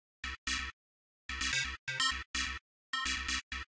Silly-sounding synth loop.
ableton, live, loop, operator, synth